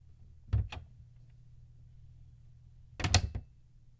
Dresser door 2
Wood, Kitchen, field, Bedroom